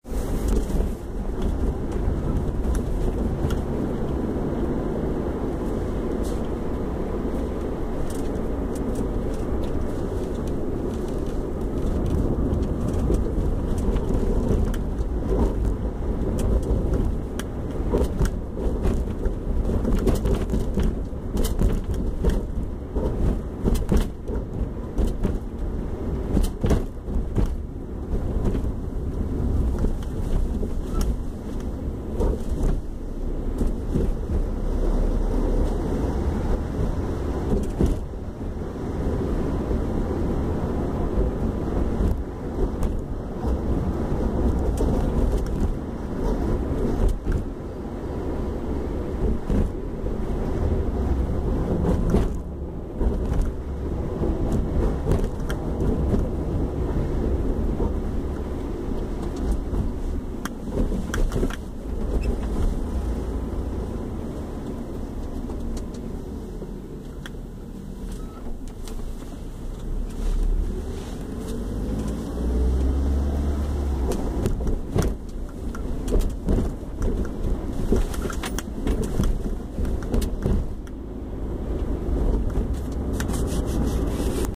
Late Night Car Drive
Sound recorded while on a night family drive.....
RoadSFX, driving, engine, vehicles, vehicle, Field, car, nightroad, night, SFX, recording, nighttime, drive, automobile